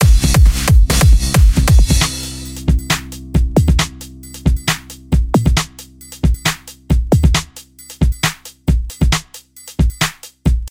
hip hop beat
hop, song, soul